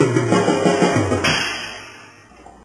Drum roll and cymbal crash loop recorded off crappy quality streaming video of Re's kid on drums...